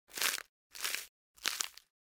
A selection of crispy spring onion sounds, suitable for sound effects. Recorded with a Sennheiser MKH60 microphone.
slice, bone, kitchen, Spring, foley, flesh, fresh, sennheiser, break, crunch, chop, rip, vegetables, gore, cut, Onion, horror